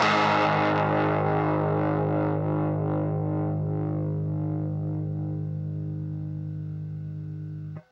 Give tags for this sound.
amp; miniamp; power-chords; distortion; chords; guitar

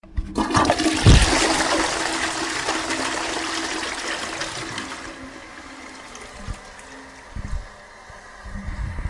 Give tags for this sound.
france; labinquenais; rennes

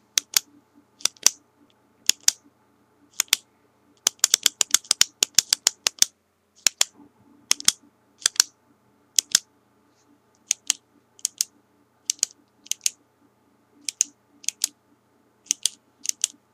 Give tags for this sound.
Ballpoint; Clicking; Office; Pen; Pens

